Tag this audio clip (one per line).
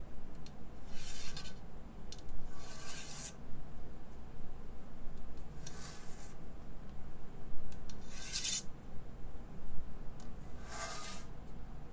Robotic
Fx
Sound